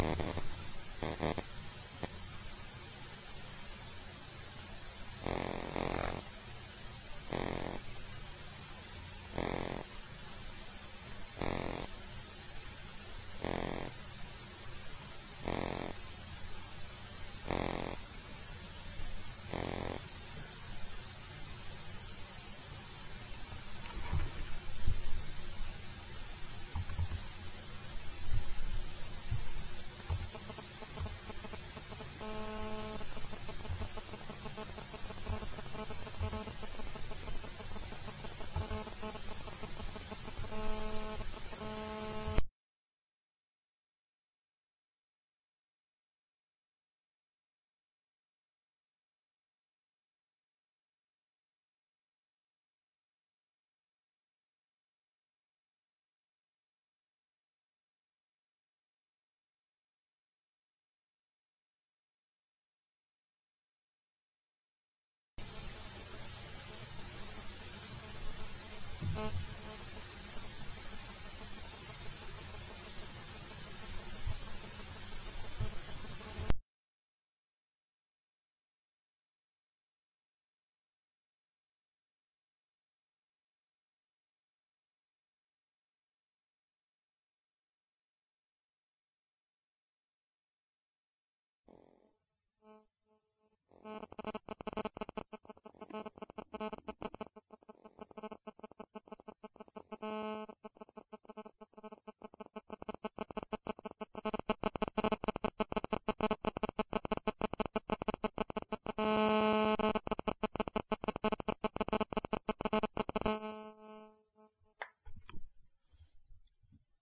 Recorded with a laptop and my cell phone this is the sound you have all heard before in stereo. There is a gap of silence in the middle for some reason, the best stuff is at the end.